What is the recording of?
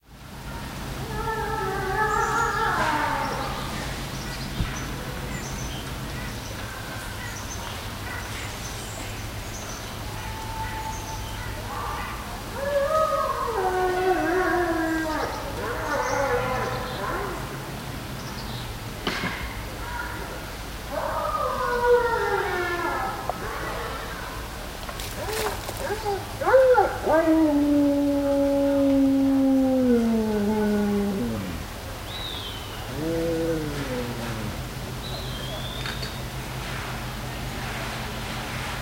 dog howl in woods
A dog howling in the woods. The sound echoes through the trees and he seems to pace from foreground to background. (recording in 1996 in a campground in Maine.)
dog, field-recording, howl